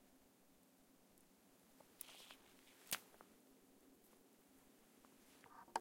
I recorded the breaking of a branch in the forest.
branch, breaking, forest